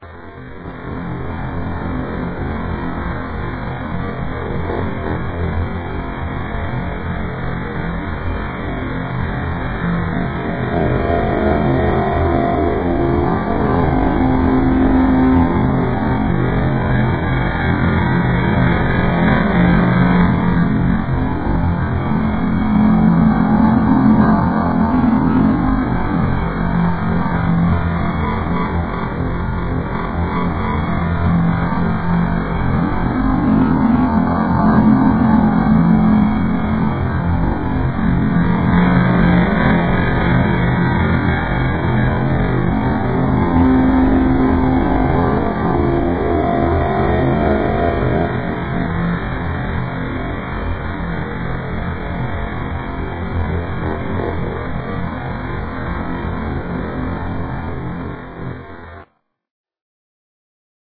A pulsating whining eerie sound depicting a UFO hovering over a field. This sound was created from manipulated waveform generated sounds. However, if you decide to use this in a movie, video or podcast send me a note, thx.
eerie, otherworldly, sound, space, ufo, ufo-sound, waveform-generated, weird